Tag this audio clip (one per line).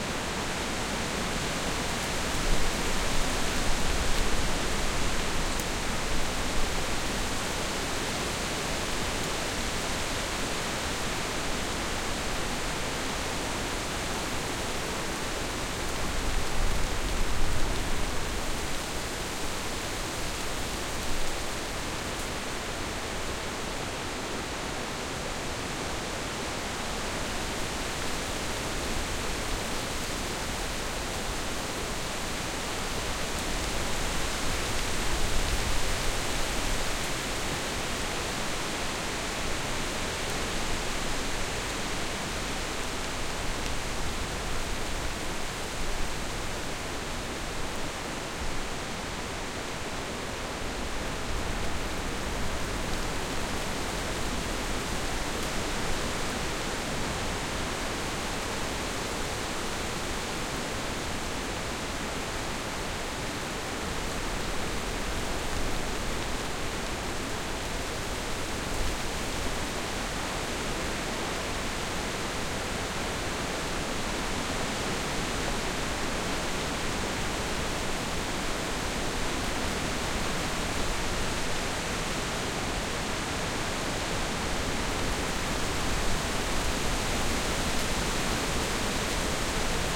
ambisonic
trees
leaf
sps200
blumlein
forrest
Soundfield
Sonosax
Wind
harpex